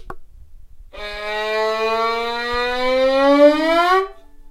violin rise up 01
A short violin rise up. Recorded with zoom h4n.